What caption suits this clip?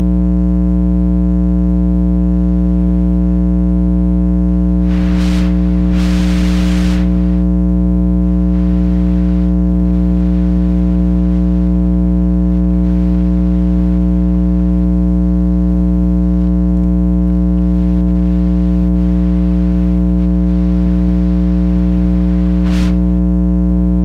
Phone transducer suction cup thing on various places on an alarm clock radio, speakers, desk lamp bulb housing, power plug, etc. Recordings taken while blinking, not blinking, changing radio station, flipping lamp on and off, etc.
hum, magnetic, transducer